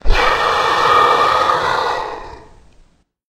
dragon roar big angry
Dragon sound created for a production of Shrek. Recorded and distorted the voice of the actress playing the dragon using Audacity.
angry, beast, big, creature, dragon, huge, monster, roar, vocalization